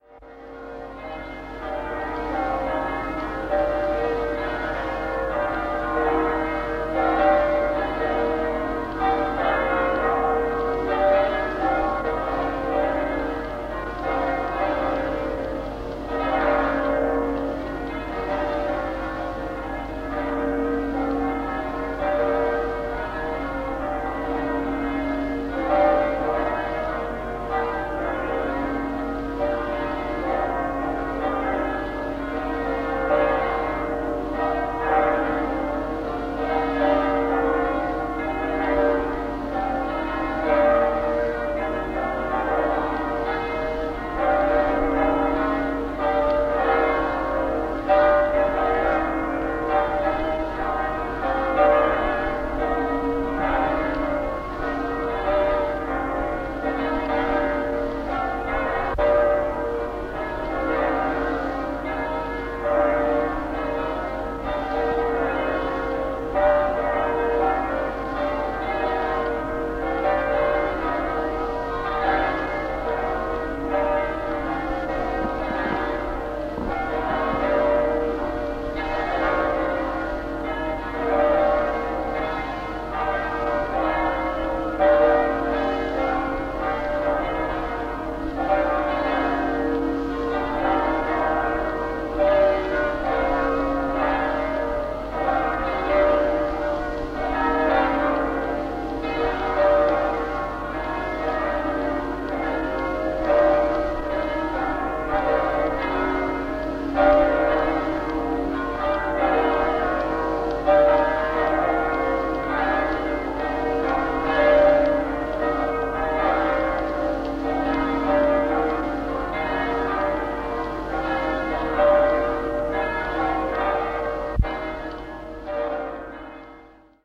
Church bells in a Pamplona side street 1985
A sample of church bells from a Pamplona side street. Recorded in 1985 on a Sony Walkman using the in-built mics. Recorded onto TDK D90 cassette and stored since then in damp cellars, sheds, and long forgotten drawers. Just today transferred to digital using my fathers old Decca Legato tape player which we purchased in the early 70s to enable us to send messages to my uncle who had emigrated to Australia. Dad says the player cost over £30 then which was more than a weeks wages at the time.
I was Inter-railing around Europe at the time but the recording seems to stop in Pamplona. Six weeks later all my money and passport was stolen while in Crete and I made my way home via the British Consulate in Athens.